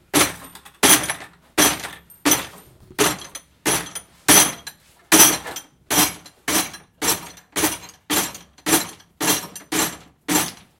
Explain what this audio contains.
Bucket Of Scrap Metal Rattles
Bang, Boom, Crash, Friction, Hit, Impact, Metal, Plastic, Smash, Steel, Tool, Tools